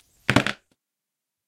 Balloon-Strain-02
Strain on an inflated balloon. Recorded with Zoom H4
balloon, strain